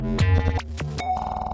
GlitchBeatDrox - cut1
beats, glitch, idm, noise